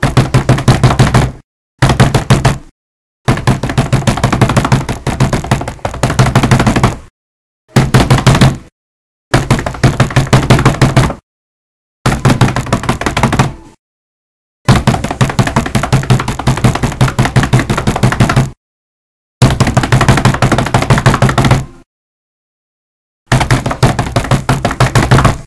I've created this funny sr pelo running sound. it's easy to create all you have to do is just slam your hands so many times as fast as you can to make it sound accurate.
funny, running, srpelo